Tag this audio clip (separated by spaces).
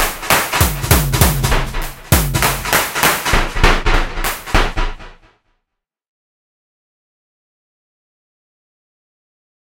120BPM ConstructionKit dance electro electronic loop percussion rhythmic